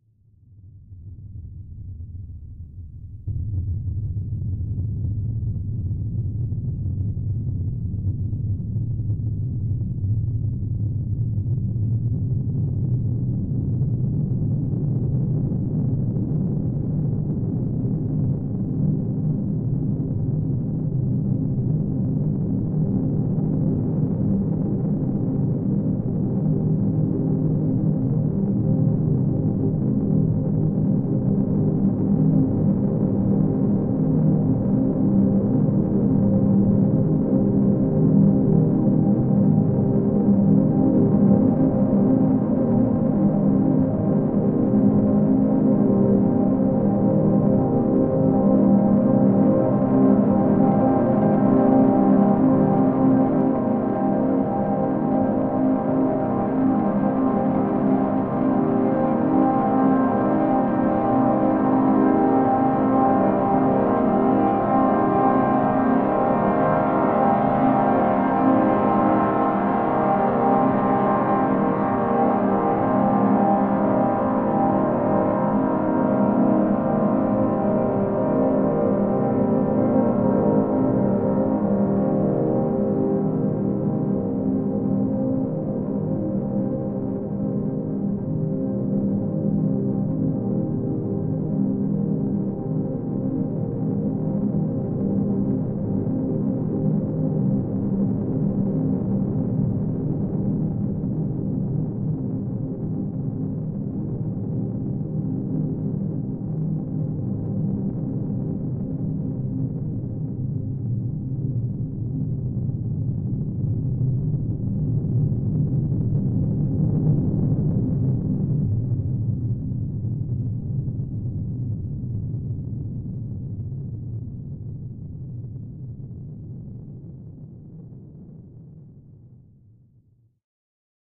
thunder pad
Thunder like rumbling gradually morphing into a more musical synth sound and back again. This sound was made with one synth patch and a single note held throughout. The changing sound was achieved by staring with a low noise sound and adjusting its pitch and level while also increasing the amount of resonance to add the musical element. These changes were then slowly reversed.There is also an underlying rhythm from a slow lfo. Part of my Atmospheres and Soundscapes 2 pack which consists of sounds designed for use in music projects or as backgrounds intros and soundscapes for film and games. Part of my Atmospheres and Soundscapes 2 pack which consists of sounds designed for use in music projects or as backgrounds intros and soundscapes for film and games.
dark, processed, synth, thunder